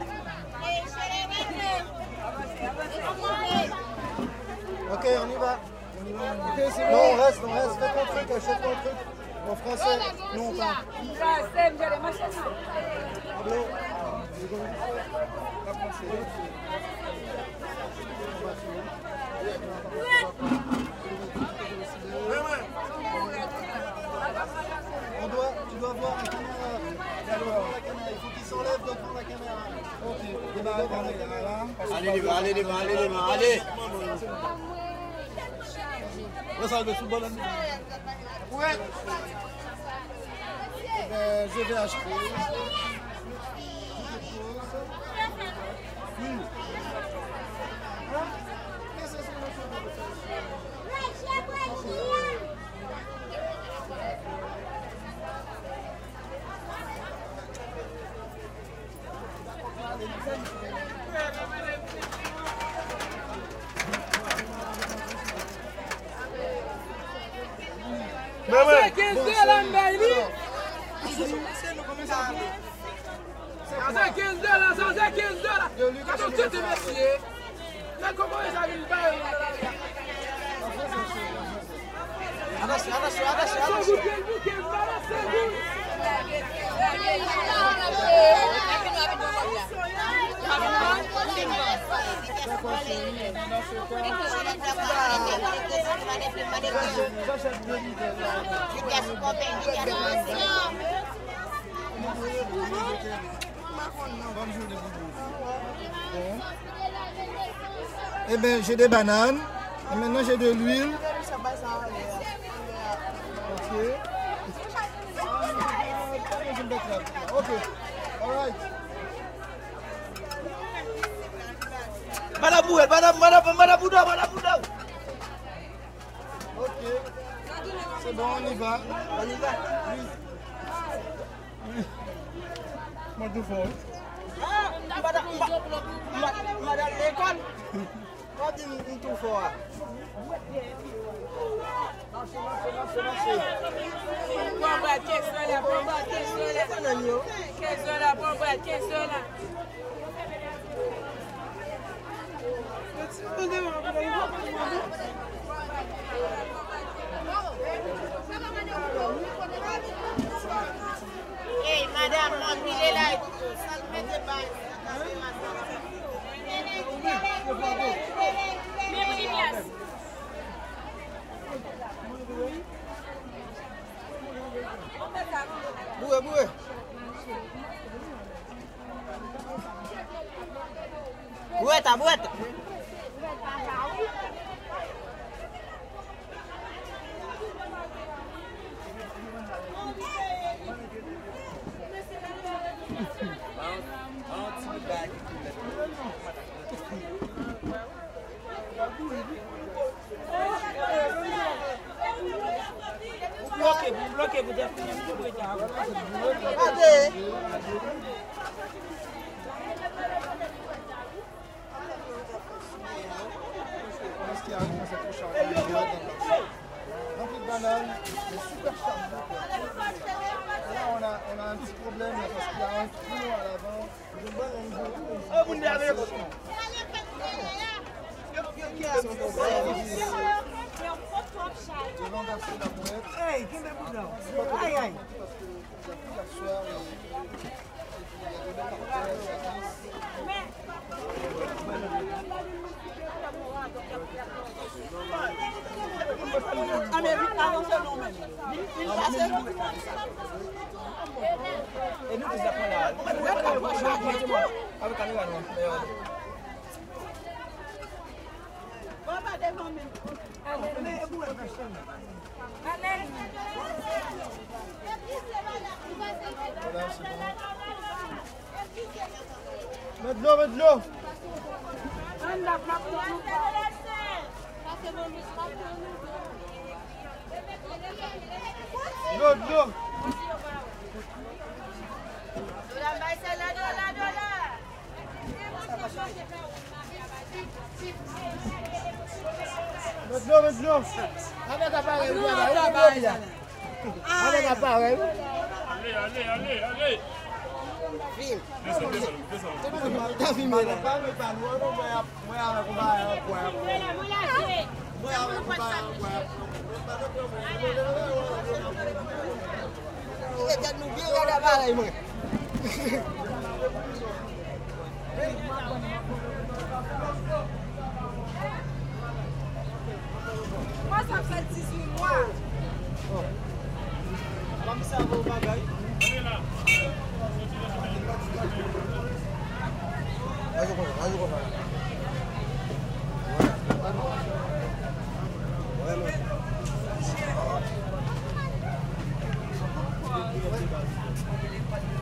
crowd ext enclosed market medium busy Haitian walla2
crowd ext enclosed market medium busy Haitian walla